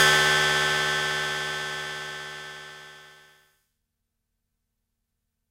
The Future Retro 777 is an analog bassline machine with a nice integrated sequencer. It has flexible routing possibilities and two oscillators, so it is also possible to experiment and create some drum sounds. Here are some.